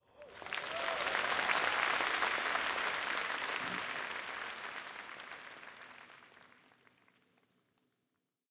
crowd applause theatre
crowd, applause, theatre